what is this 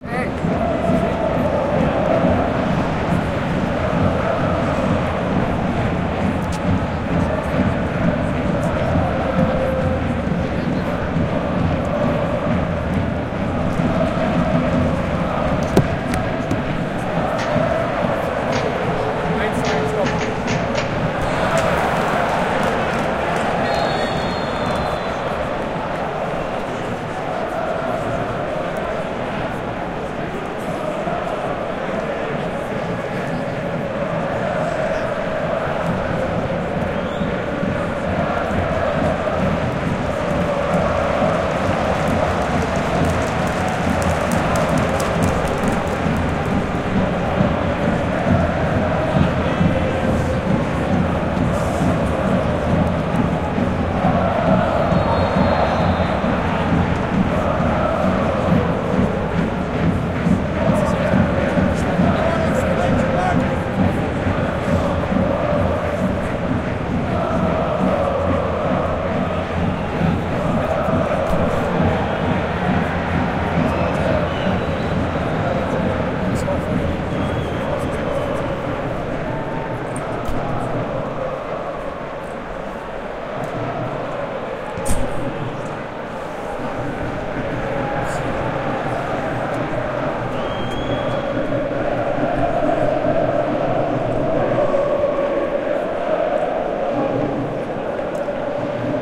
Inside the Soccer-Stadium ARENA AUF SCHALKE when FC Schalke 04 met Hannover 96, Bundesliga season 2007/2008
football, emscher, spectators, schalke, stadium, soccer